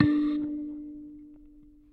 96kElectricKalimba - K4clean
Tones from a small electric kalimba (thumb-piano) played with healthy distortion through a miniature amplifier.